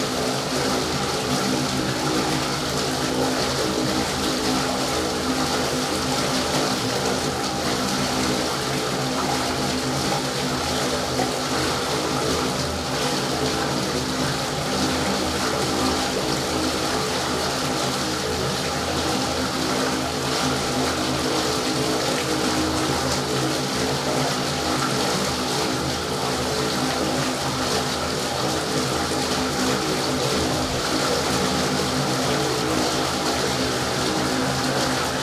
culvert thru manhole cover
Monophonic recording of a storm sewer, heard through a small hole in a manhole cover on the street. Some minor EQ and editing.